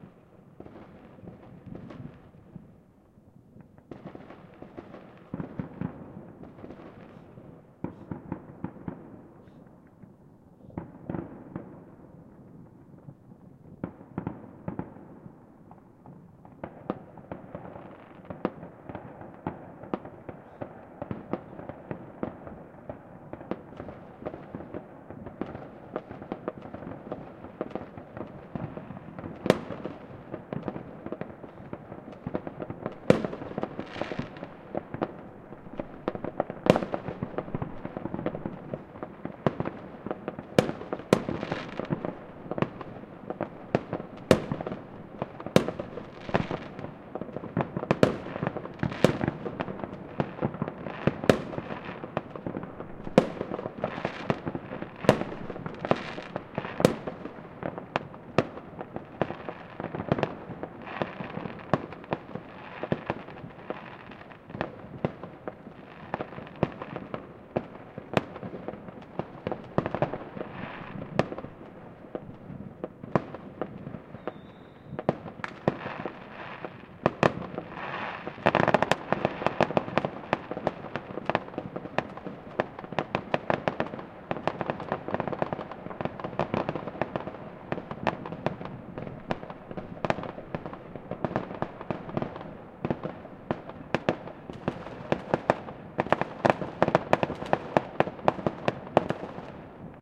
New year fireworks